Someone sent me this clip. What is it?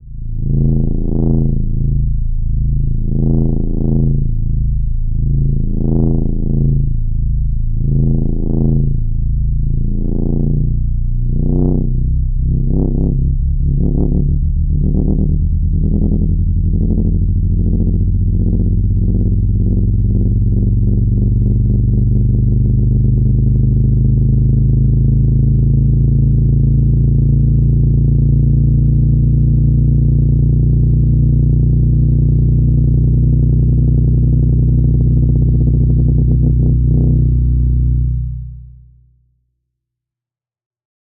Recreation of bass drone effect from the movie ´Raiders Of The Lost Ark´. This is the rising version (pitch and lfo going up).
Request was "cyclical deep bass sound that the ark emits when its opened".